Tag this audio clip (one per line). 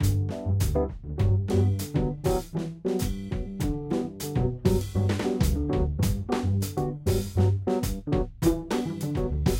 jazz
samples
vst